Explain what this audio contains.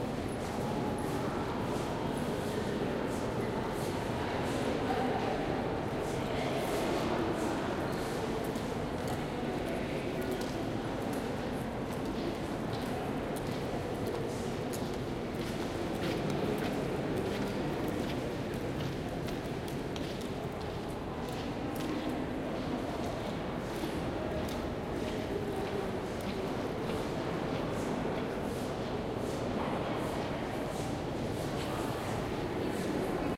Central staircase in Louvre with sound of visitors.
hall, Louvre, noise, people, stairs